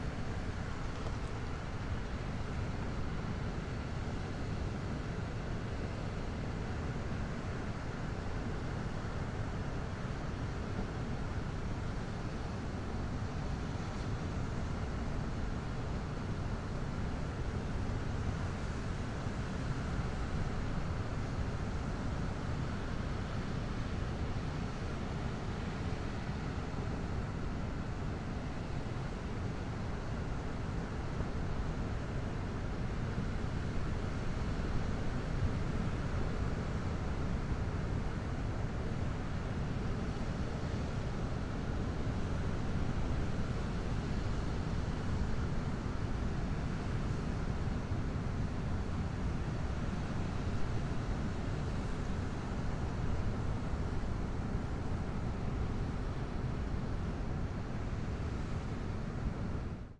BARRAÑÁN BEACH ORTF
Short recordings made in an emblematic strtch of Galician coastline located in the province of A Coruña(Spain): The Coast of Death
beach, ocean, sea